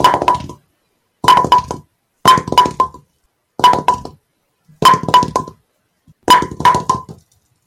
Single bowling pin knock
A single bowling pin my local bowling ally got me for my birthday getting knocked on the ground of my room. Recorded and processed in Audacity (Headset mic)
ball, bowling, bowling-ball, bowling-pin, ground, hard, hit, hitting, knock, knocked, pin, pins, single